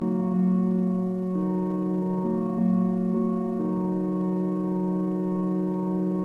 Quilty's 4-Peice Orchestra 2
A kind of drone to got with the rest of the samples.
ambient, melody, orchestral, new-age, drone, sad